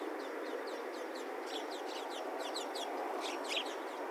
Several sparrows chirping as they fly past. Recorded with a Zoom H2.